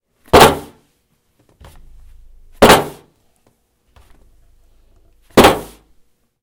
Box On Metal 1
A cardboard box hitting a piece of metal and sliding on it.
box, hits, metal, cardboard, impact